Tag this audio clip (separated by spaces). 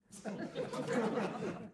theatre funny happiness humor giggle lecture laugh audience